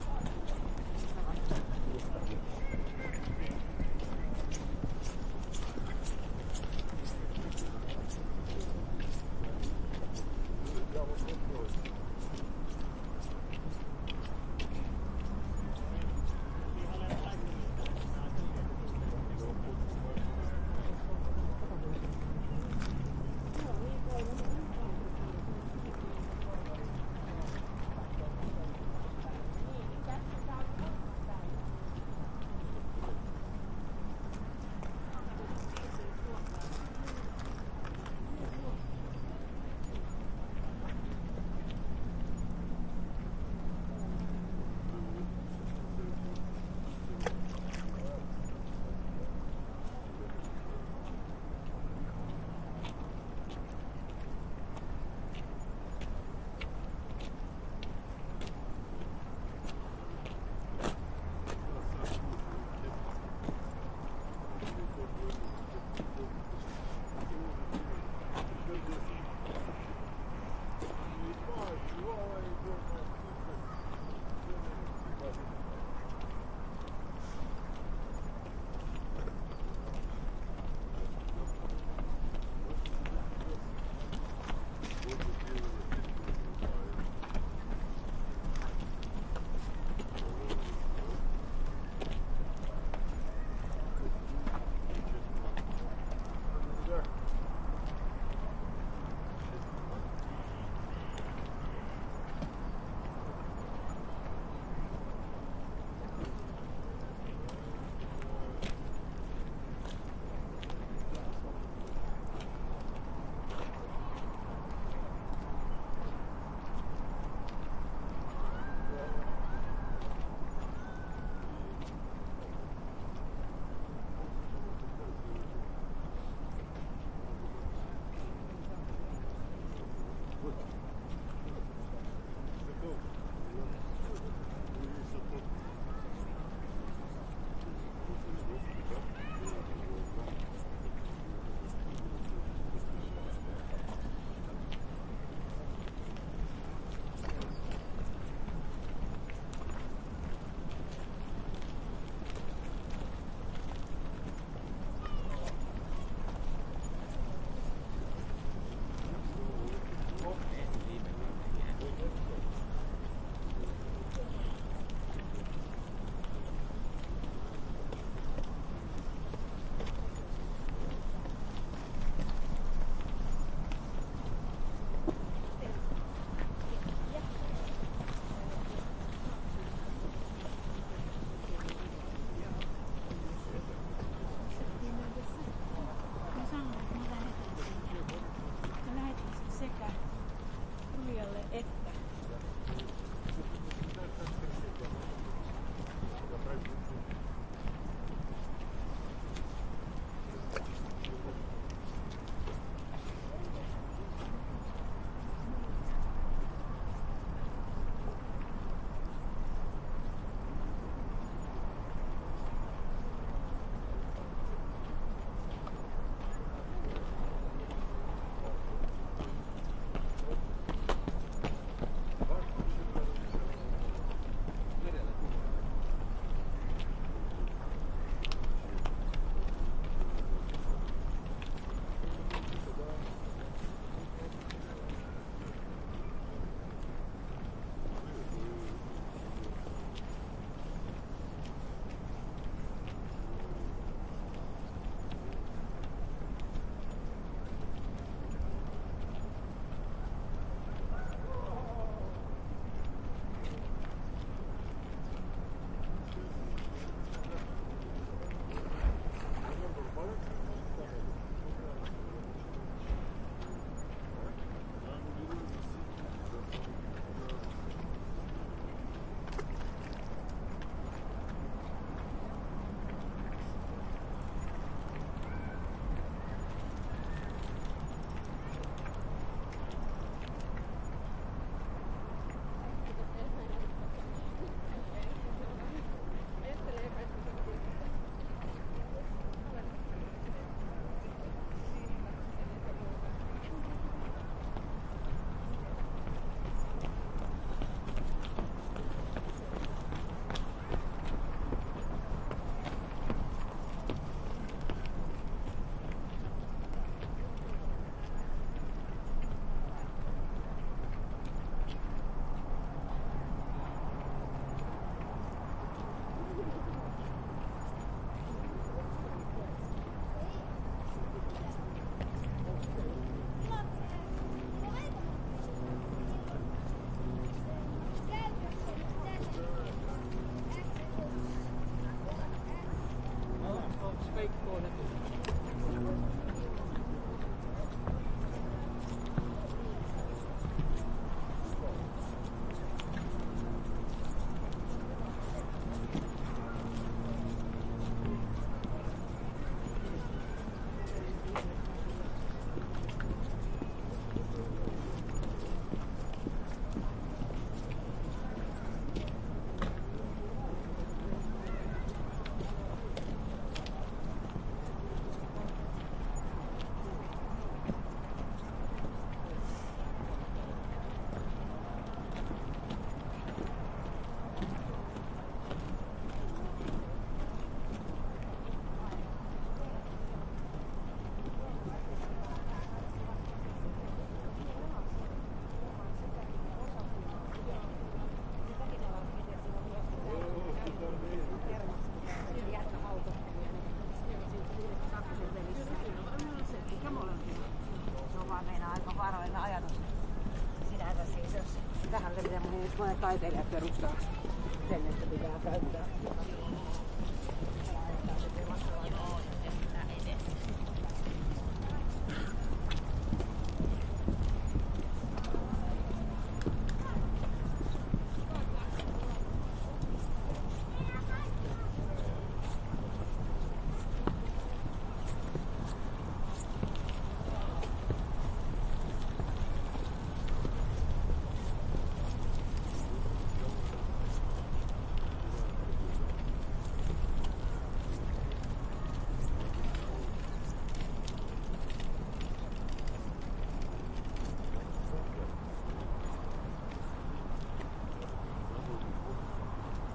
Atmo Bridge, people pass behind mics, fishermen, ducks, some talk in Finnish
Recorder: Sony PCM-D100 (120 degrees stereo)
Location: Matinkaaren silta, Helsinki, Finland